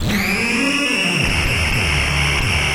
A cheap Behringer Mixer and a cheap hardware effects to create some Feedbacks.
Recorded them through an audio interface and manipulated in Ableton Live with a Valhalla Vintage Verb.
Then sound design to have short ones.
Electronics, Mixer, Artificial, Feedback, Synthetic, Noise